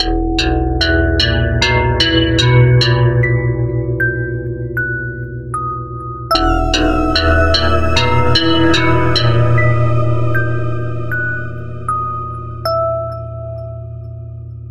Dark Loop #2
Dark/mystery loop made in FL Studio.
mystery, film, dramatic, terror, television, imaginair, haunted, sinister, game, arp, series